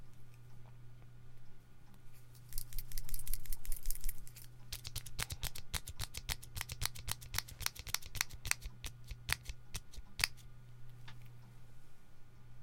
A recording of shaking a mechanical pencil and trying to get the lead to come out by clicking the top of the pencil.
lead, lead-pencil, pencil, mechanical-pencil, mechanical